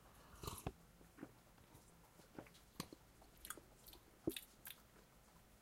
Slurping tea and smacking lips
Taking a slurp of tea and smacking lips.
drink, lips, sip, slurp, smack, tea